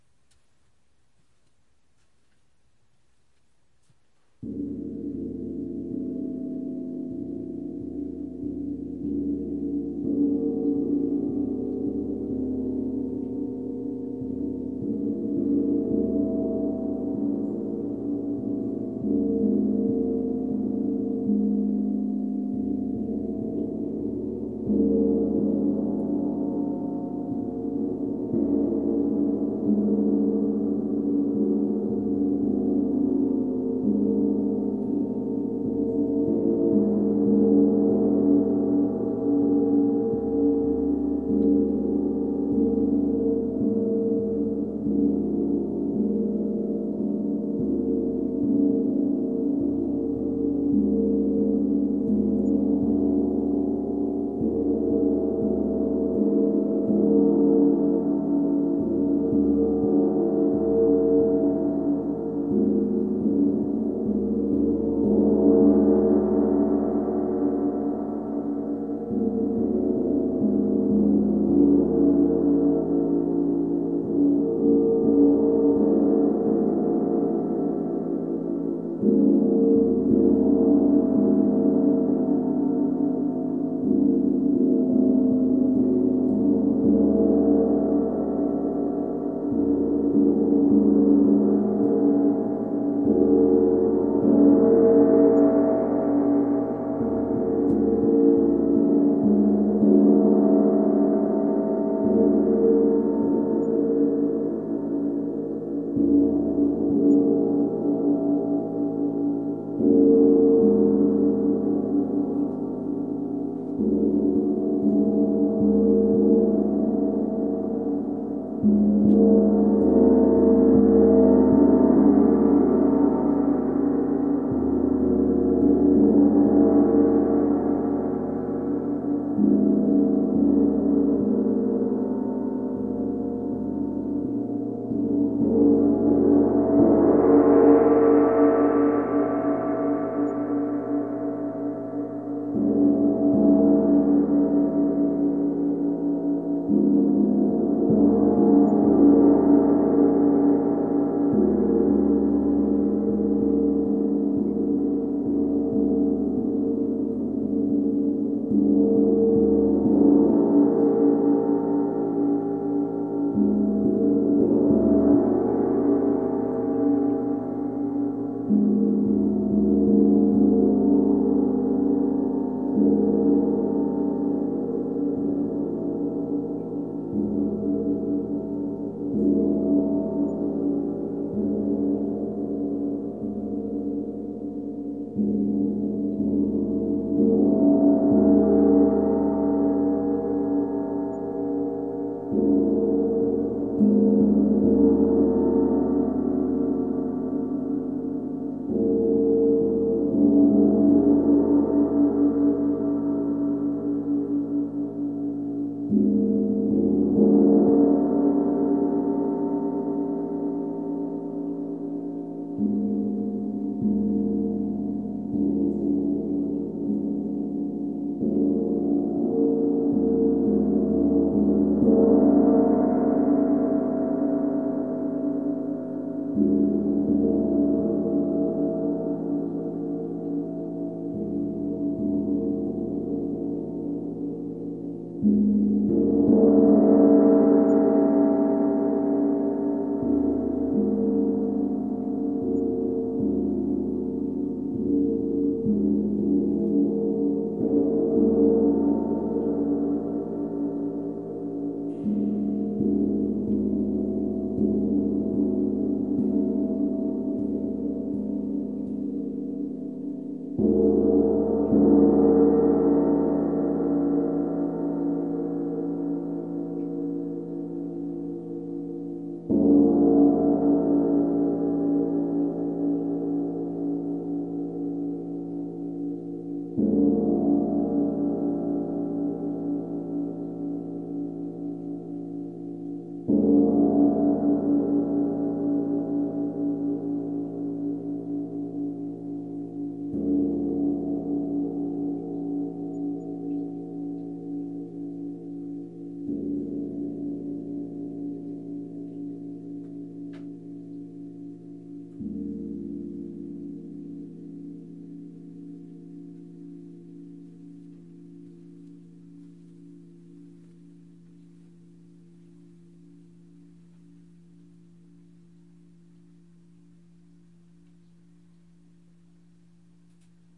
Single track live studio recording on 30-inch Zildjian Gong, emulating rolling thunder in the distance. Recorded on Zoom H4 mic at 8-feet, centered, in Soundwell home studio, Boise, Idaho USA.